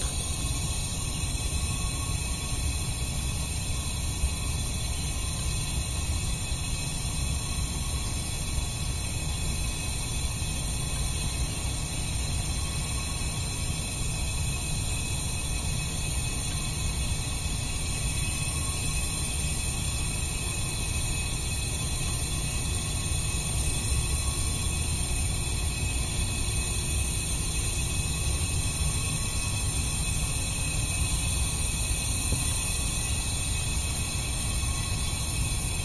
Hiss from gas exhaust, home. Zoom H2 internal mics.